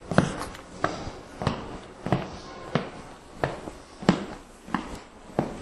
Footsteps on Wood
The sound of loafer on plank.
echo, footsteps, wood